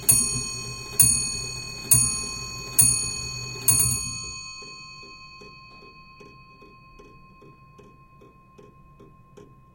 Old Scots Clock - Ring the Hour - Five O'clock
18th Century Scottish clock rings five o'clock. This is such a sweet, unusual clock. Recorded with a Schoeps stereo XY pair to Fostex PD-6.